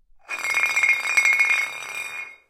spinning bottle
a JB whiskey bottle spinning on tiled floor in a bathroom
recorded with zoom h6 stereo capsule